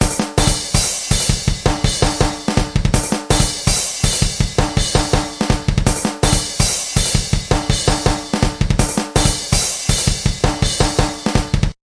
Drum loop 7
Tempo is 82. Used these in a personal project. Made with CausticOSX.
groovy, drum-loop, garbage, quantized, loop